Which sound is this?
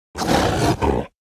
Wolf & Dog Aggressive
Aggressive, bark, dog, growl, howl, wolf